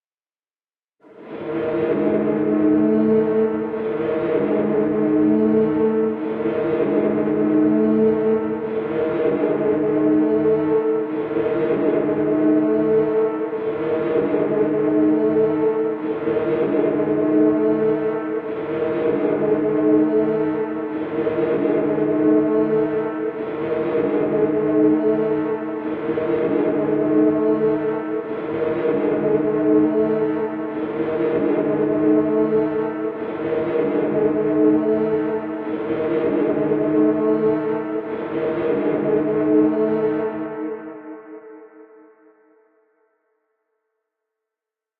Strange Signal
effect, sound-effect, sfx, sci-fi, fx, haunted, sound-design, horror